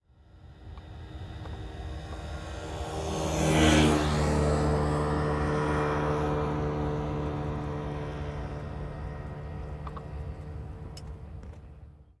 Scooter drive by
Field recording of a scooter driving by on a country road. Doppler effect. mono sound. Sennheiser 8060 mic /Zaxcom Nomad recorder.
Alloy, Aprilia, BMW, Genuine, Mahindra, Peugeot, Piaggio, Royal, doppler, drive, engine, honda, moped, passing, riding, scooter, suzuki, vespa, zip, zoom